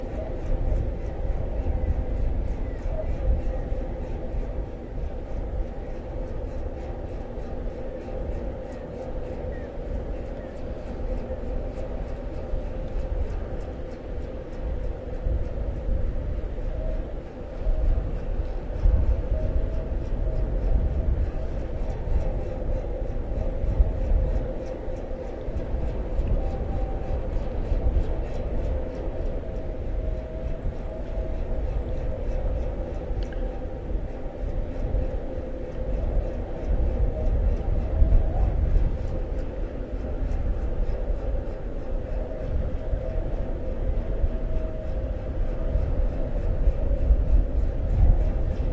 svalbard ny aalesund 20060807
The wind sings in the old airship mast in Ny Aalesund, Svalbard. Marantz PMD 671. Sennheiser stereo handmic.
zeppelin, wind